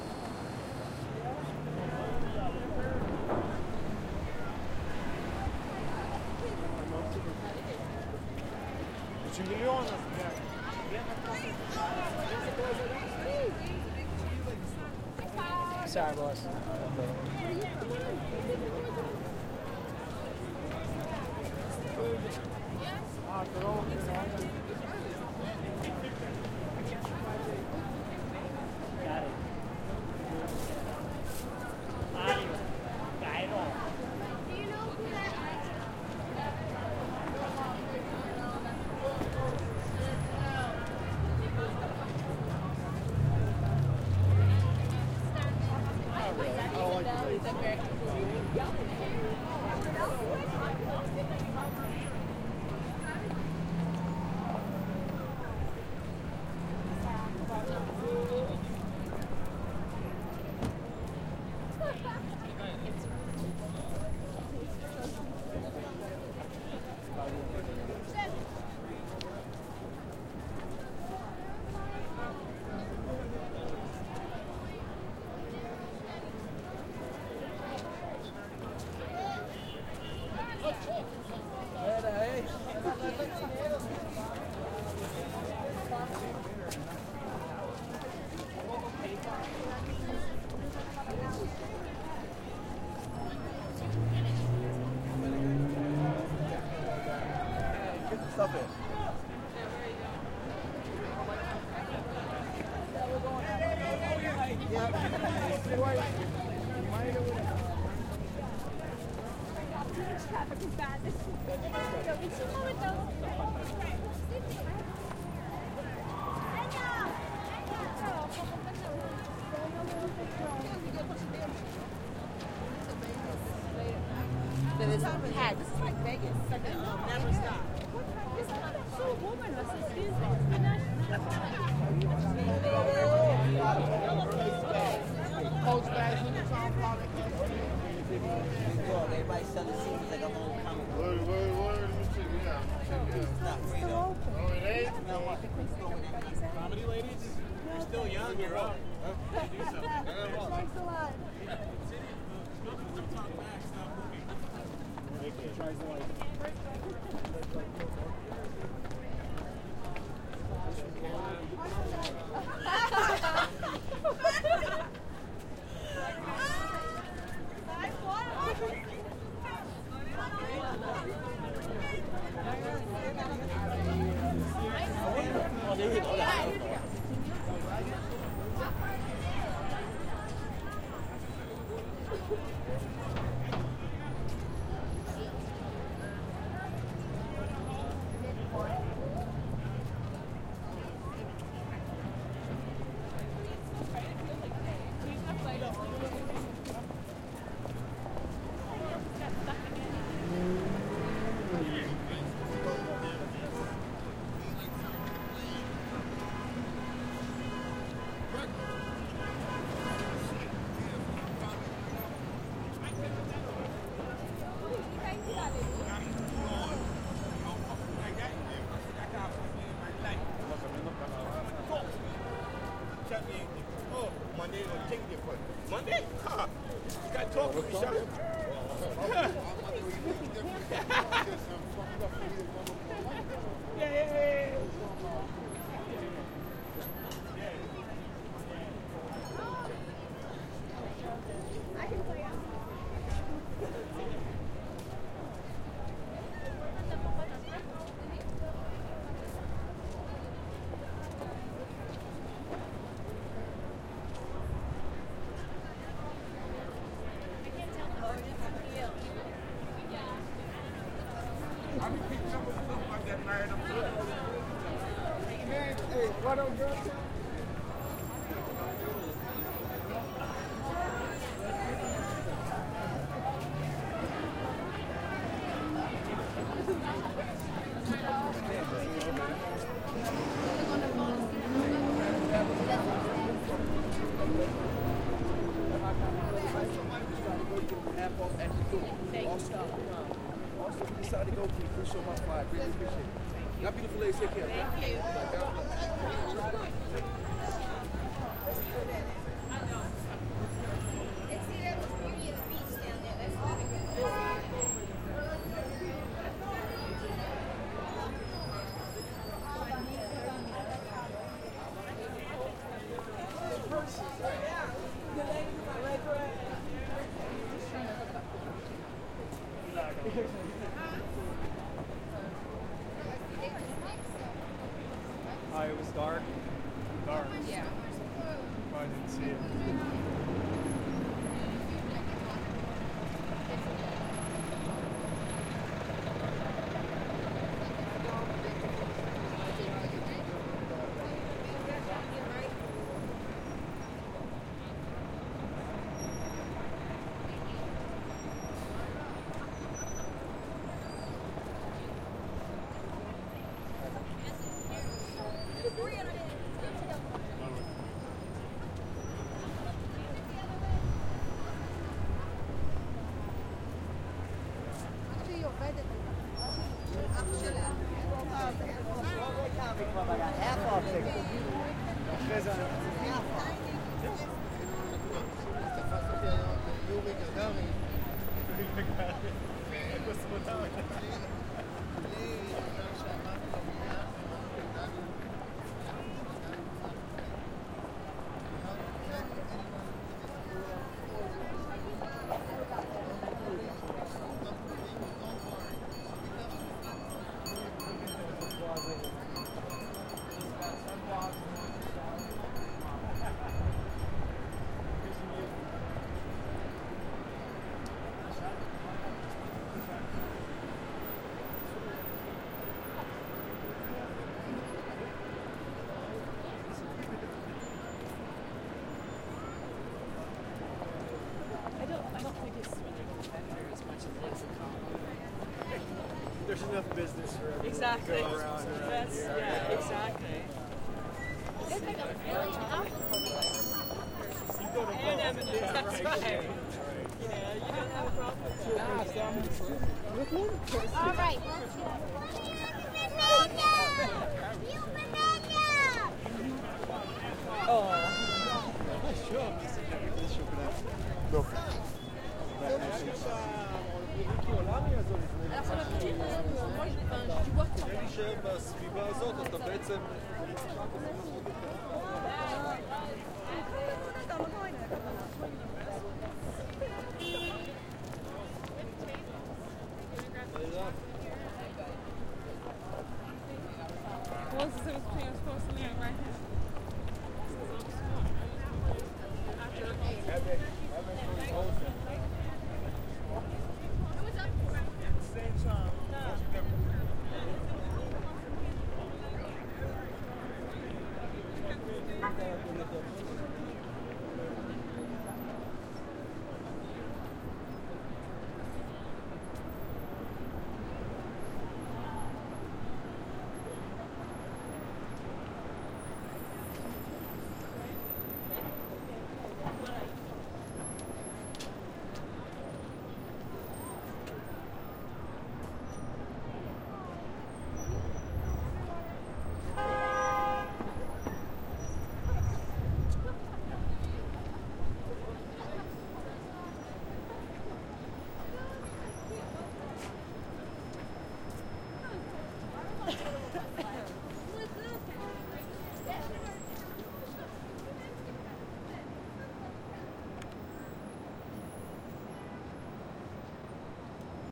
015 walking time square part 1
Walking around Time Square in New York City at around midnight Friday March 2nd (Technically Saturday the 3rd, but you know what I mean). It was a bit windy that night so unfortunately there is some wind noise.Recorded with Zoom H4 on-board mics and included wind muff.Part 1 of 2 (walking on Broadway)